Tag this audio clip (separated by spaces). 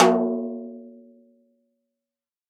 multisample drum snare velocity 1-shot